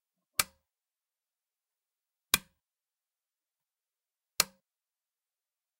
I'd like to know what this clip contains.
BEDSIDE LAMP SWITCH
A bedside lamp being swiched on and off.